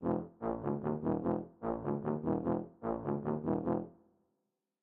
Comedic tuba sound effect, ideal for pantomime or humorous theatre.
comedy, effect, fat, pantomime, sound, theater, theatre, Tuba